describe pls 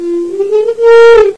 A nail violin made from a gourd with 16 penny spike coming out of a soundboard. These are bowed with a violin bow. Recorded at 22khz
handmade, invented-instrument, bowed